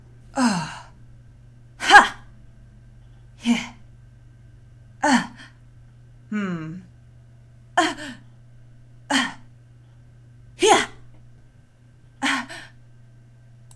rpg - tough girl battle sounds
RPG sounds - a tough heroine in battle.